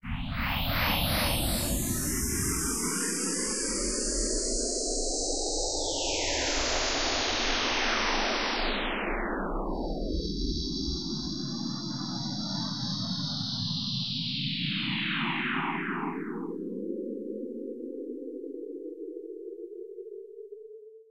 I took a random image I made based off of a cool pattern, edited it to have random dots and lines in certain places and put it into the VirtualANS. It sounds like some crazy alien technology doing its job.